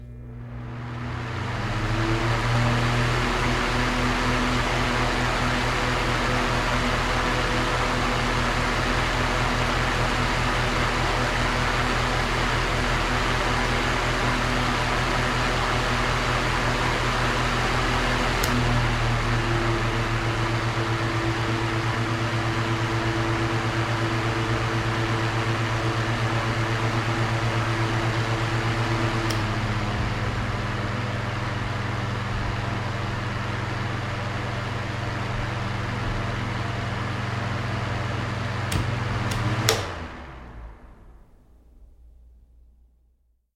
A small table fan.
air, fan, machine, wind